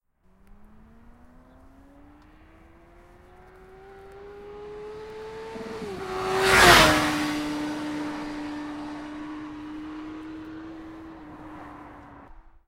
Sportsbike passing at speed on a racetrack.
Zoom H1 internal mics.